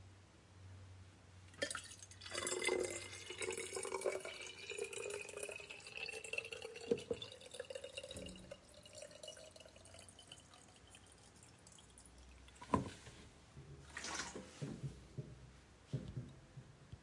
fill-metal-bottle
This is the sound of filling a metal bottle with water
Este es el sonido de llenar una botella metálica con agua
Grabado en Apple Watch Series 6
metal-bottle, botella, termo, thermo, botella-metalica, bottle, agua, llenar-botella, water